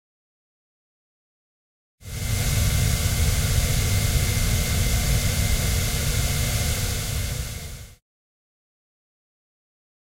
Crack in spaceship
CZ, Czech, Panska